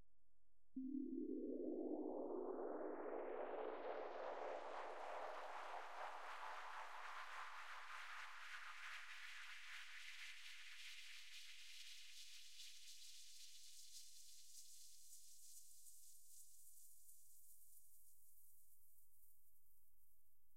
Sweet dreams
A simple mellow sine pling processed in Guitar Rig 4 with several delays that raises the pitch of the sound. Gives a magic swirly feeling. Perfect when you're entering into a dream state.